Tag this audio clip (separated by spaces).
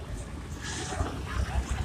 05-ambiente 1 No Of Paisaje Palmira Proyect SIAS Sonoro Sounds Soundscape Toma